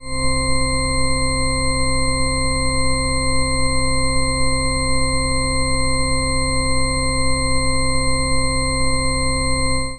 Sine Waves - Only C Notes
This sound consists of sine waves only playing the musical note C, each for a total of 10 seconds.
You can still use this sound!
MIDI, sine-wave, notes, Mellyloon, tone, C